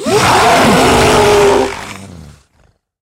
A monster voice sound to be used in horror games. Useful for all kind of medium sized monsters and other evil creatures.